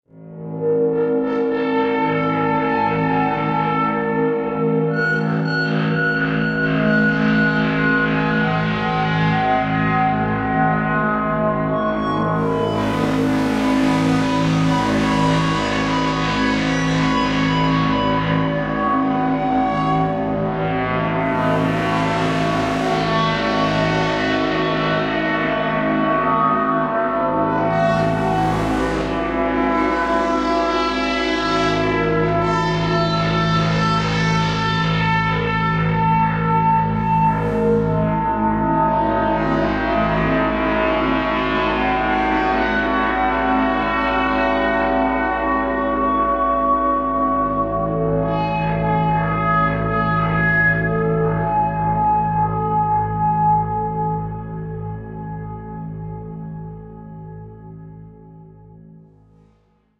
A sound generated in the software synthesizer Aalto, recorded live to disk in Logic and edited in BIAS Peak.
abstract, metallic, ominous, resonant, soundscape, synthesized